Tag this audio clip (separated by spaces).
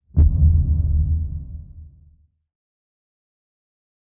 effect sound thump